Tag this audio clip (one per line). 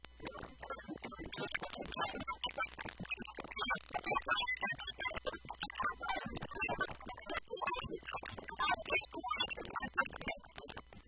broken-toy
circuit-bending
digital
micro
music
noise
speak-and-spell